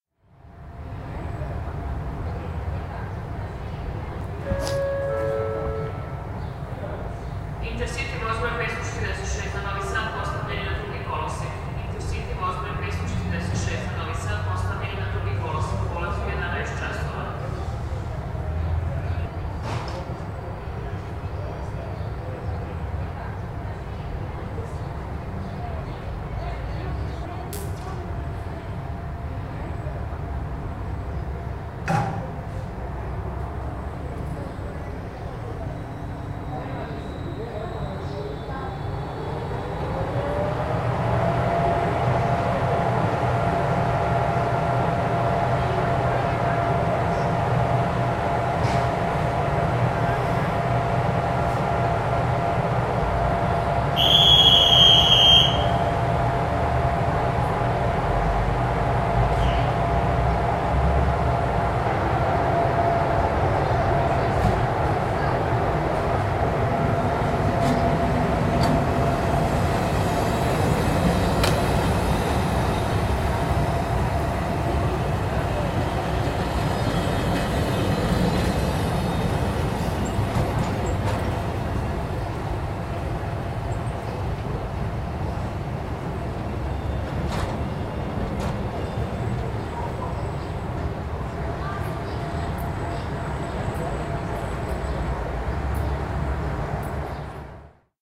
Train leaving the station and the atmosphere of the station
announcement, leaving, platform, rail, railway, station, train